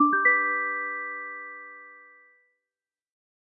Achievement Happy Beeps Jingle
achievement, application, beep, bleep, blip, bloop, button, buttons, click, clicks, correct, end, event, game, game-menu, gui, lose, menu, mute, puzzle, sfx, startup, synth, timer, ui, uix, win